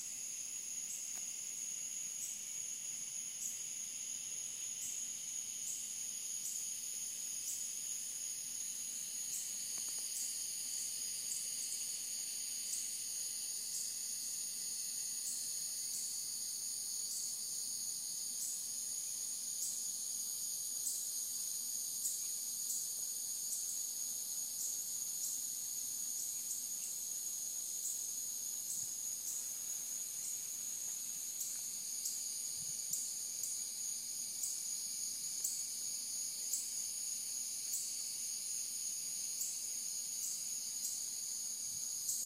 Cicada Insects 8 26 13 8 07 PM
Short field recordings made with my iPhone in August 2013 while visiting family on one of the many small residential islands located in Beaufort, South Carolina (of Forrest Gump, The Prince of Tides, The Big Chill, and The Great Santini fame for any movie buffs out there).
Beaufort, birds, cicadas, crickets, day, field-recording, forest, frogs, hot, humid, insect, insects, jungle, low-country, nature, night, semi-tropical, South-Carolina, summer, tropical, USA